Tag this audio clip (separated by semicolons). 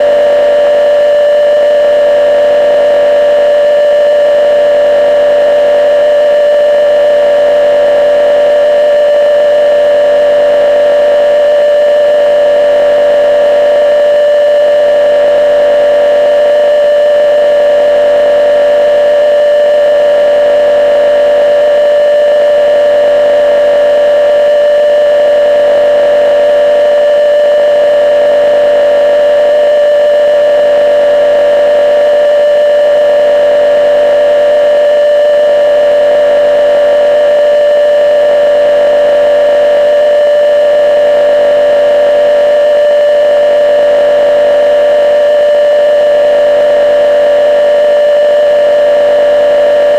resonance; eurorack; modulation; game-design; atmosphere; synthesised; noise; synthesizer; modular-synth; drone; experimental; game-sound; machinery; abstract